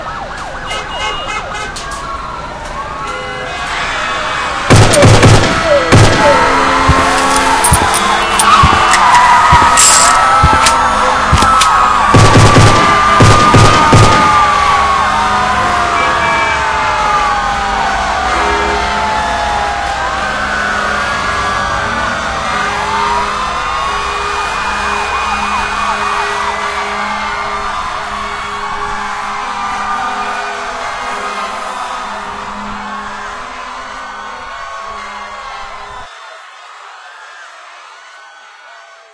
A collection of free sounds that have been mashed together in audacity to create this brutal killing scene